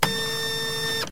noisy printer sample, that i chopped up for a track of mine, originally from..
user: melack
industrial, robotic, energy, electrical, movement, mechanical, printer